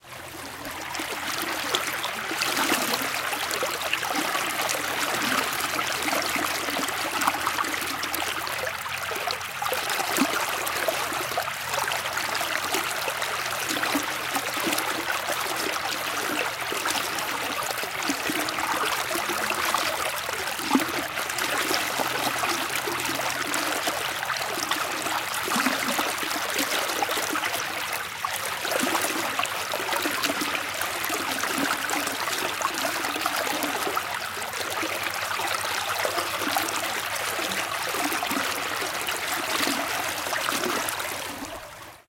191123 stream flow water close
stream water flow close perspective
brook, Stream, water